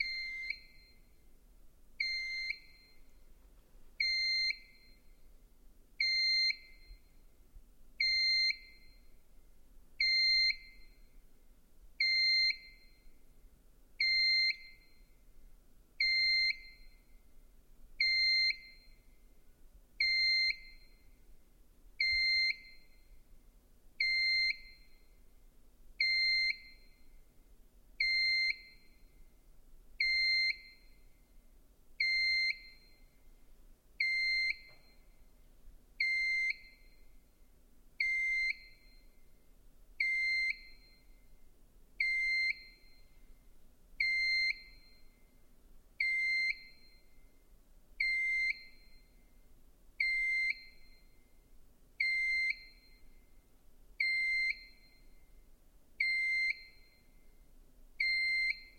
Beeping Danger
Beep, Beeping, Boop